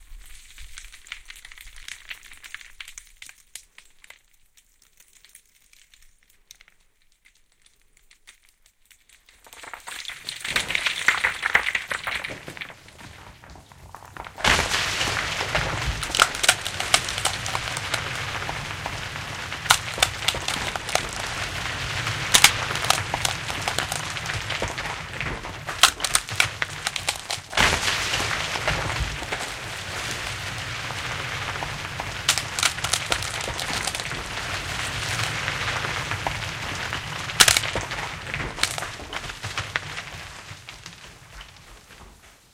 Landslide effect I made for extra credit. Includes oniwe's stones-falling-in-quarry-binarual, benboncan's rockfall-in-mine, and inspectorj's cracking-crunching-a.
disaster, earth, landslide, natural, quake, rocks, shaking